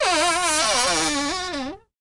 Grince Plac Lg Md Spe 2
a cupboard creaking
creaking, cupboard, door, horror